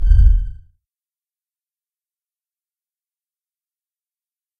A slightly wobbly sound. But what is it?